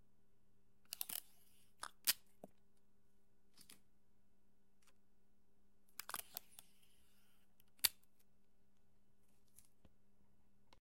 Scotch tape dispensing/unraveling and ripping.